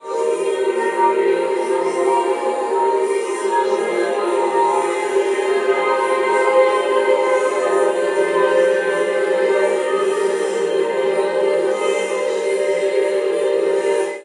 Astral Choir
A choir from a far-away galaxy. Recording of a real choir processed via software.